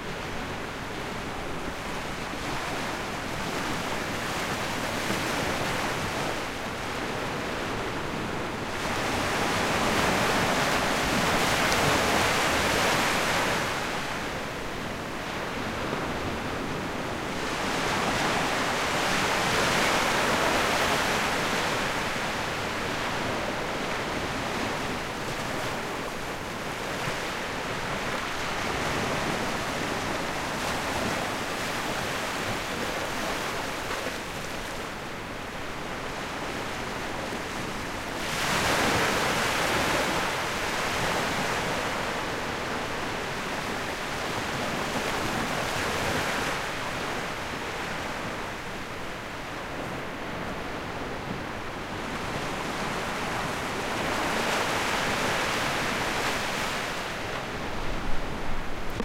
Audio captured on the island of Superagui, coast of the state of Paraná, southern region of Brazil, in March 17, 2018 at night, with Zoom H6 recorder.
Small waves. Light wind.
beach, coast, ocean, sea, seaside, surf, waves